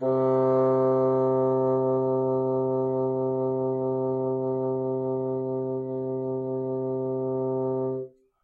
One-shot from Versilian Studios Chamber Orchestra 2: Community Edition sampling project.
Instrument family: Woodwinds
Instrument: Bassoon
Articulation: vibrato sustain
Note: C3
Midi note: 48
Midi velocity (center): 95
Microphone: 2x Rode NT1-A
Performer: P. Sauter